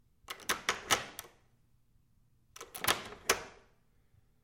Oldfashioned doorlock locked, unlocked. H4n with Rode mic.
click, household